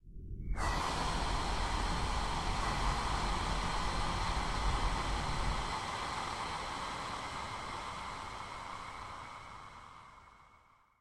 whisper inhalish1
Just some examples of processed breaths form pack "whispers, breath, wind". Extreme time-stretching (granular) and reverberation.
suspense, noise, breath, steam, processed